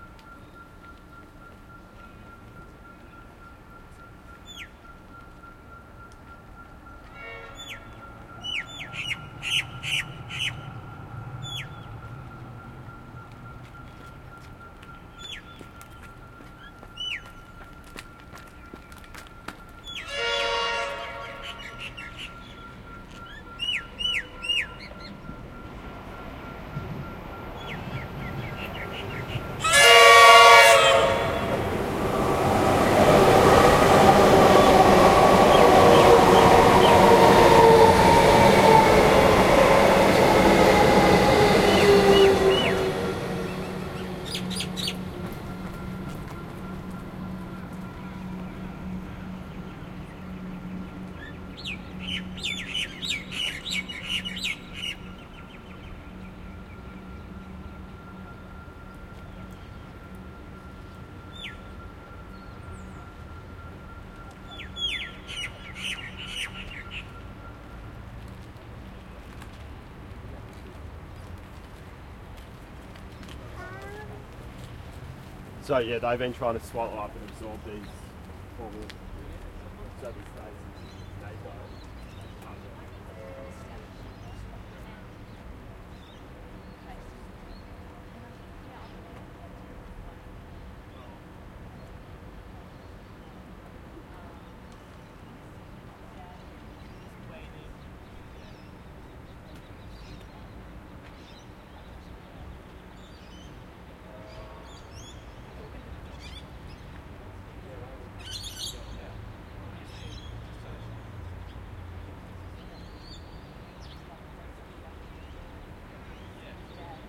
Recorded with Zoom H2N in 4CH Surround
Melbourne, Victoria, Australia
Suburban Train Passes
4CH, City, Surround, Train